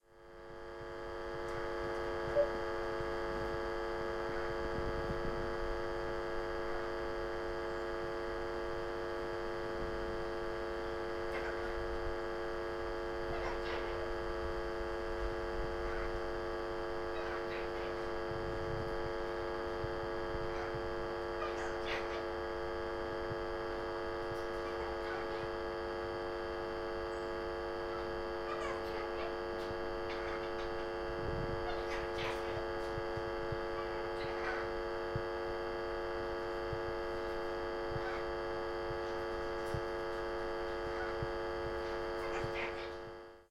birmingham-botanical-gardens-mains-hum-from-tannoy
Mains hum being picked up by a faulty Tannoy pa speaker in the Birmingham Botanical Gardens. Cockatoo in the background is mimicking human speech.
birmingham, botanical, drone, field-recording, gardens, hum, power, transformer, uk